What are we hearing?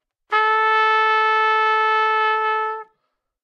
Part of the Good-sounds dataset of monophonic instrumental sounds.
instrument::trumpet
note::A
octave::4
midi note::57
good-sounds-id::2838